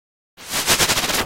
Retro video game sfx - Spinning toss
A good sound for some kind of attack
8-bit, arcade, atari, bfxr, chip, chipsound, game, labchirp, lo-fi, retro, video-game